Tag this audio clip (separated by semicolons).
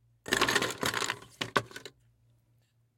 ice; Scooping; container